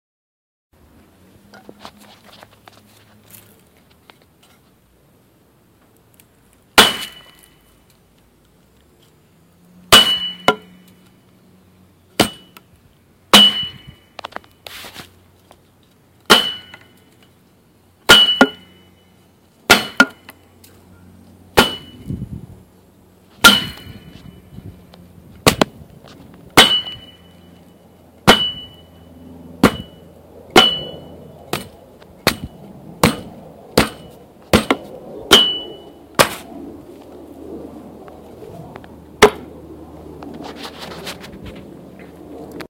Bat Bludgeoning
Foley sound effect of aluminum bat used to bludgeon a victim. Produced by a wooden bat struck against a metal post covered in foam, with some chicken bones for texture.
baseball-bat, Bat, crunching